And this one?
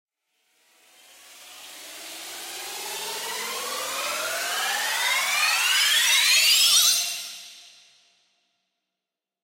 Knife Party Uplifter
A Uplifter that reminds me of the Electro House Duo KnifeParty